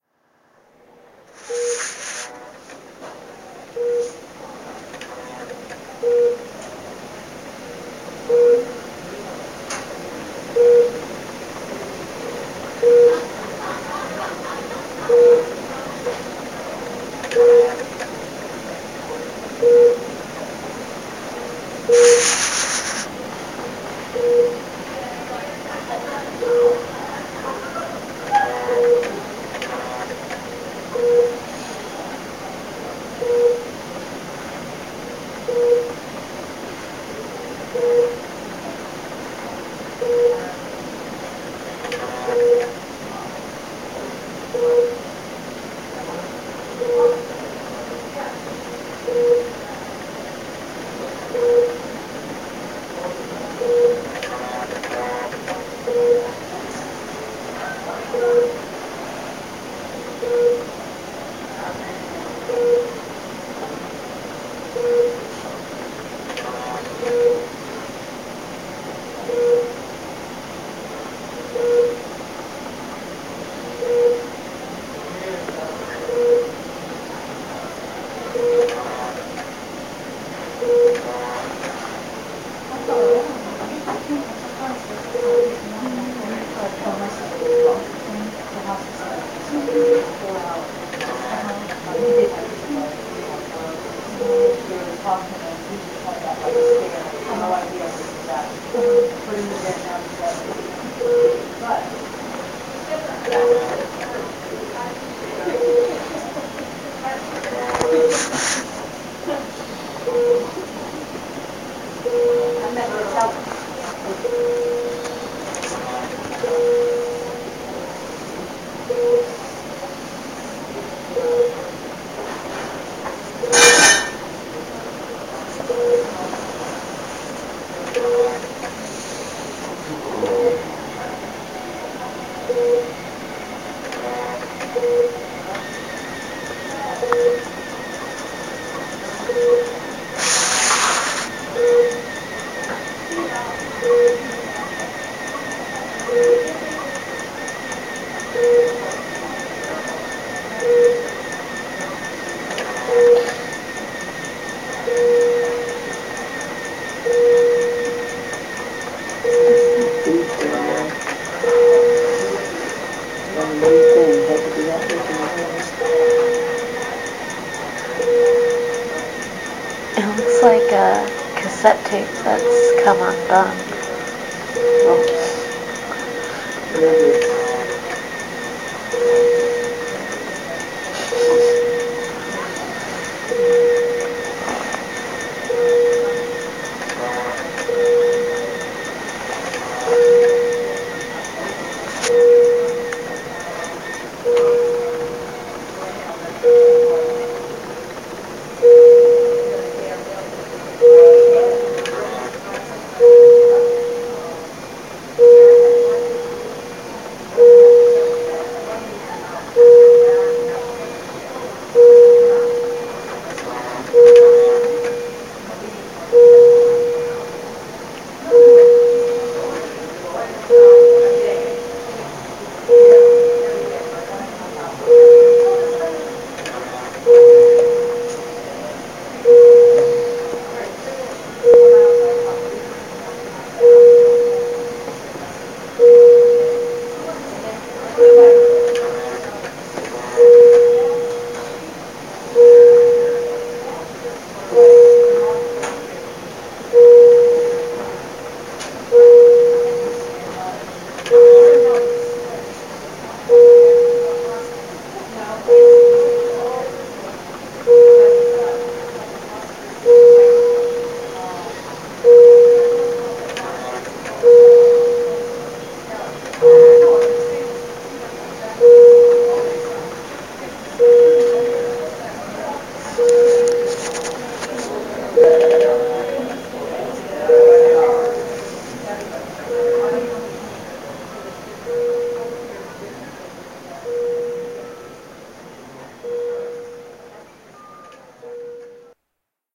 MICU beeps MaryWashingtonHospital Oct2011
A few minutes of the beeping sounds in a MICUwing of a hospital. There's also laughter in the background at the beginning, another mini-conversation later, and a couple of glitches. This was recorded with my phone.
machines, whirring, beeping, ambient, hospital, beep, medical-icu